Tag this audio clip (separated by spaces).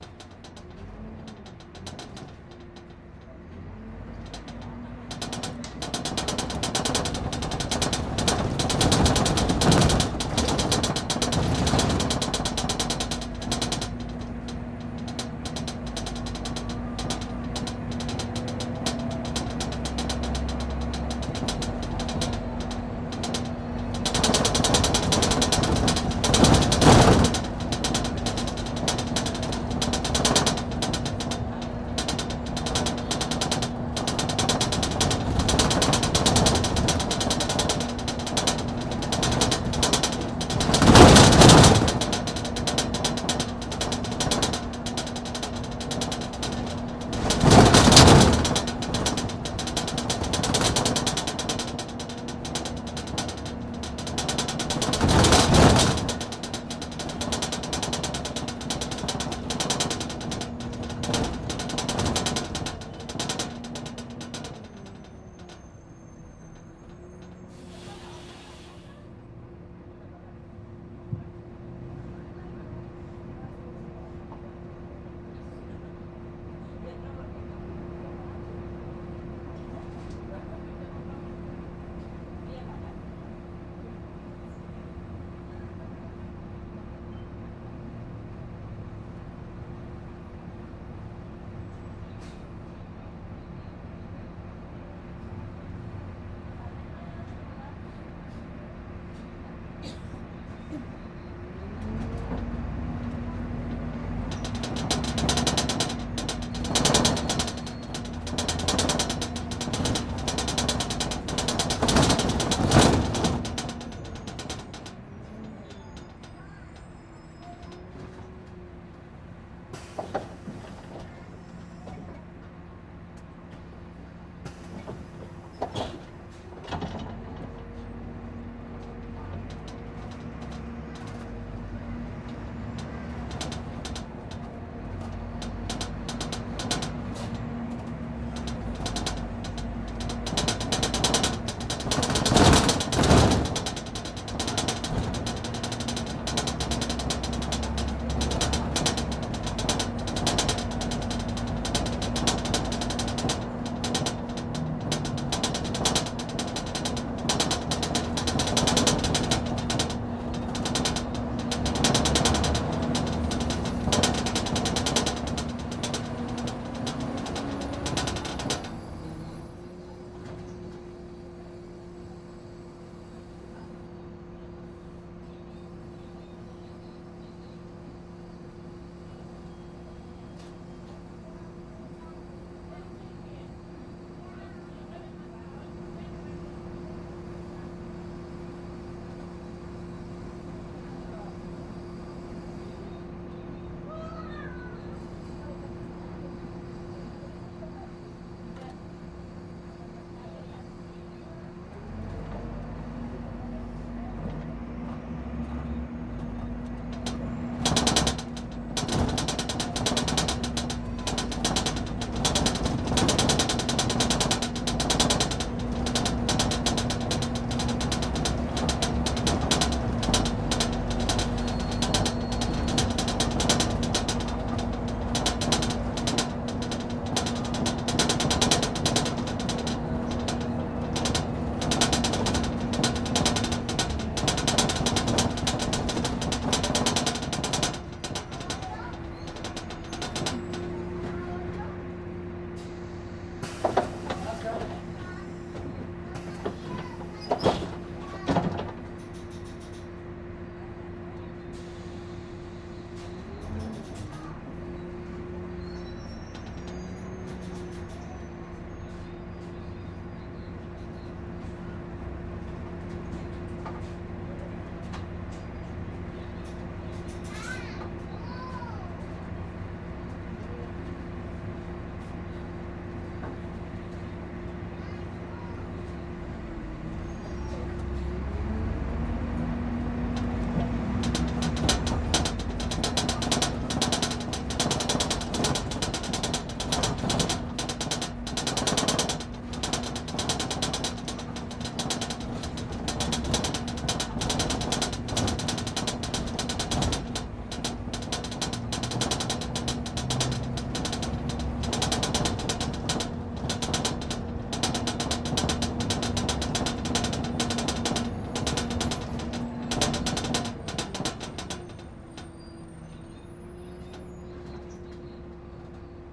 window urban bus city transantiago traffic glass rattle